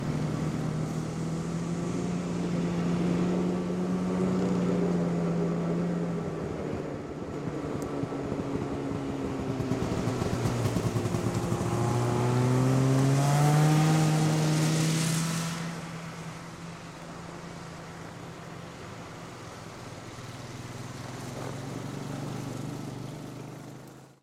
snowmobile pass slow medium speed nice